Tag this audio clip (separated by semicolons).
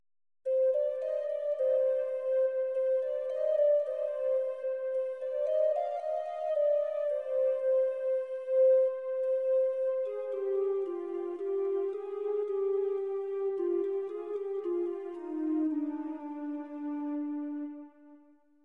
sci; fi